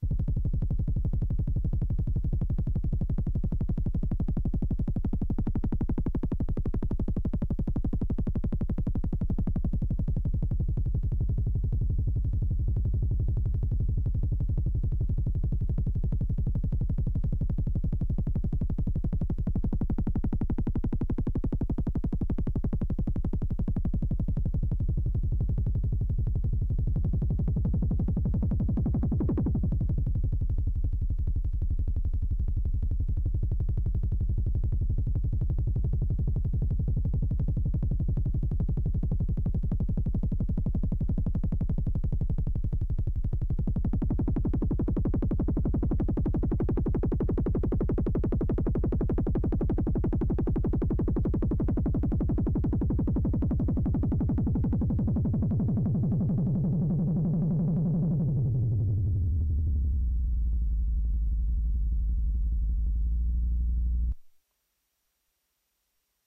Monotron Helicoptor
A series of sounds made using my wonderful Korg Monotron. This sample reminds me of helicopter similar to those from war movies.
Korg, Space-Machine, Electronic, Machine, Sci-Fi, Monotron, Space, Futuristic